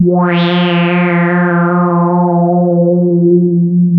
Multisamples created with subsynth. Eerie horror film sound in middle and higher registers.